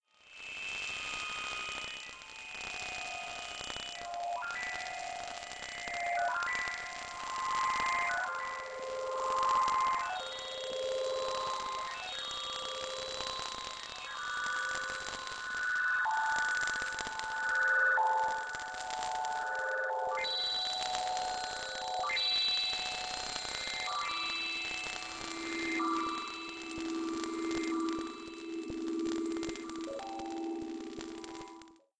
Noise phone
Noisy digital phone.
abstract, fx, noise, phone, synth, weird